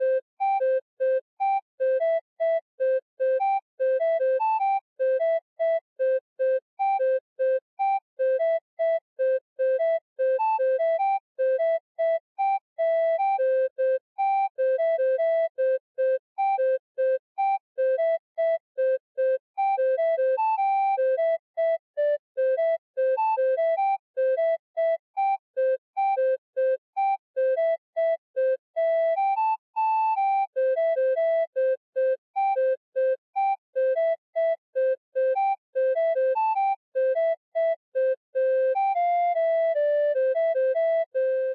Pixel Flute Melody Loop
Useful for happy area and shops in pixel games
Thank you for the effort.
pixel, ocarina, flute, melody, music, happy, loop, game